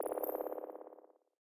A Dark Forest Bird Whistle, Perfect For Ambience.
Created With FL Studio, used Sylenth1, and some others effects plugins.
ambience, bird, dark, forest, game, whistle